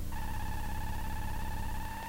Micro beeps 002
Electronic beeping like from a computer.
Recorded from a Mute Synth 2. Sorry about the noisy recording.
beep, electronic, Mute-Synth-2, Mute-Synth-II